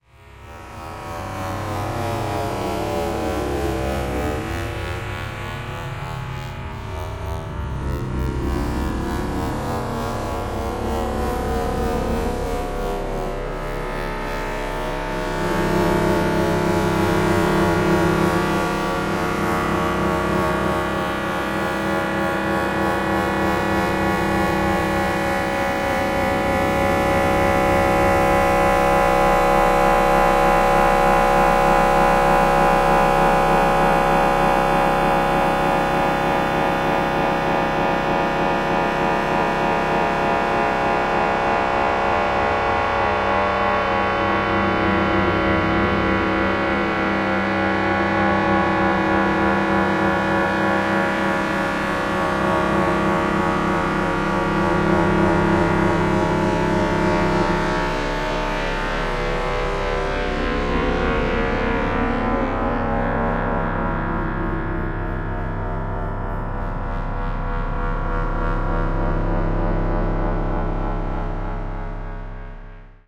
Time Nightmares - 07
Time dilation dilated into concave ambient drone washes.